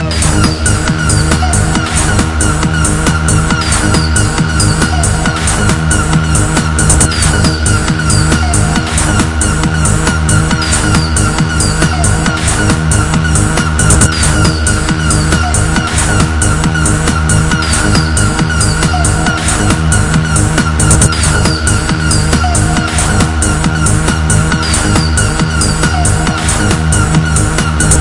best night club loop ever made by kris klavenes
night-club-music, wave, techno